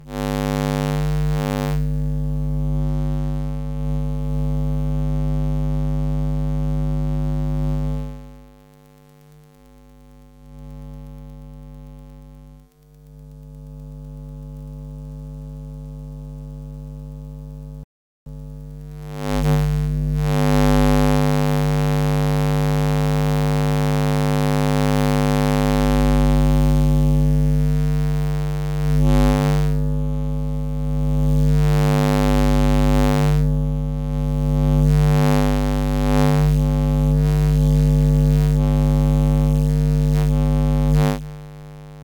EMF alarm clock hum modulated
This sound effect was recorded with high quality sound equipment and comes from a sound library called EMF which is pack of 216 high quality audio files with a total length of 378 minutes. In this library you'll find different sci-fi sound effects recorded with special microphones that changes electro-magnetic field into the sound.